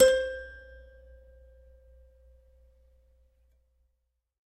multisample pack of a collection piano toy from the 50's (MICHELSONNE)
collection, michelsonne, piano, toy